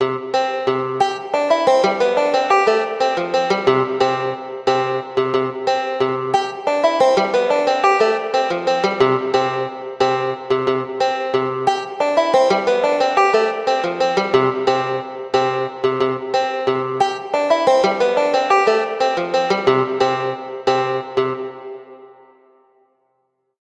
This loop is a Midi Banjo melody line that can be looped easily. The original tempo is 90bpm and could be bumped up to 120 while sounding clean.
Banjo, Folk, Midi